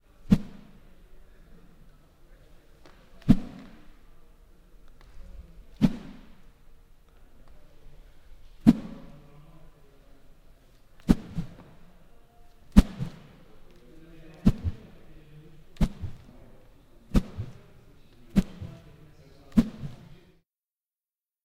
Swishes with the wooded pole.